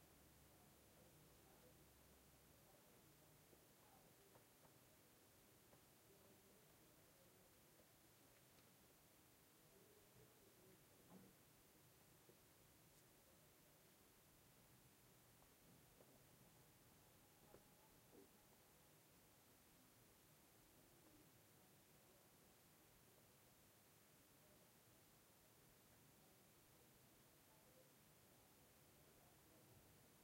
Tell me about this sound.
20070330.silence.me66.mkh30
'silence' (mostly white noise) as coming from Sennheiser ME66 (left) and MKH30 (righ channel) microphones. The mics were plugged into a Shure FP24 preamp set at mid gain, and output recorded with Edirol R09 with low cut filter on.
noise, silence, test